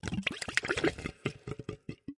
suck out 1
various sounds made using a short hose and a plastic box full of h2o.
blub, bubble, bubbles, bubbling, drip, gurgle, liquid, suck, sucking, water, wet